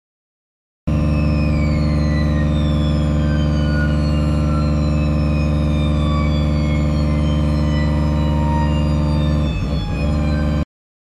ELECArc-int overtones ASD lib-zoom-piezzo-stephan
buzz, distorted, electro, overtones, techno